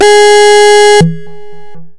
Overdrive pulse wave G#4
This sample is part of the "Overdrive pulse wave" sample pack. It is a
multisample to import into your favorite sampler. It is a pulse
waveform with quite some overdrive and a little delay on it..In the
sample pack there are 16 samples evenly spread across 5 octaves (C1
till C6). The note in the sample name (C, E or G#) does indicate the
pitch of the sound. The sound was created with a Theremin emulation
ensemble from the user library of Reaktor. After that normalizing and fades were applied within Cubase SX.
multisample, overdrive, pulse, reaktor